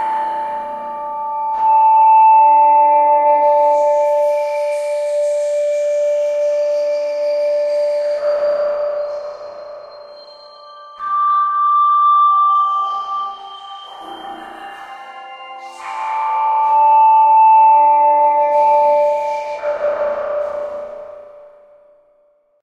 A ringing series of tones with reverb and clicks created from various synths and effects within Reason software.